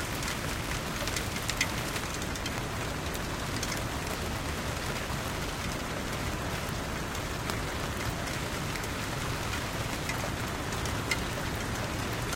Soft rain which can be looped, this has been recorder with my Blue Yeti.